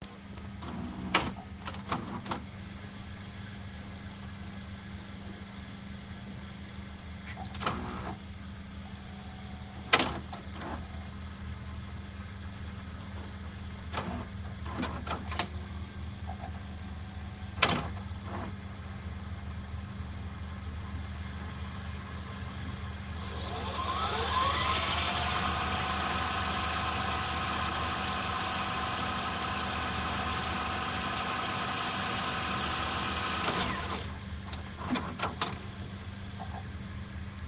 Sound of VHS tape being rewinded/fowarded and ejected from device.